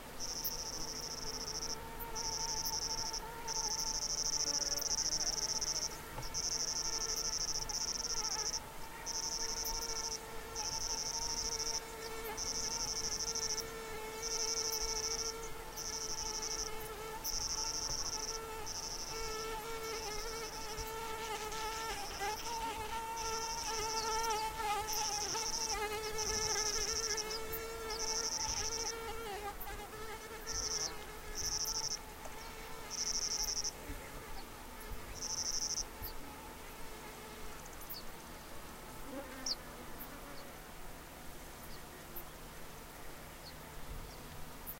20060628.insects.earlymorning
insect sounds recorded early in the morning in Mediterranean scrub during summer. Includes Sandwasps, cicadas, flies and others. Sennheiser ME66 > Shure FP24 > iRiver H120 (rockbox) / sonidos de inectos por la mañana en el matorral, con chicharras, Bembix, moscas...
field-recording, cicadas, ambiance, insects, scrub, donana, nature, summer